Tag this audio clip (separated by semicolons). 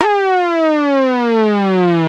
effect; fx; game; sfx; sound; synthesizer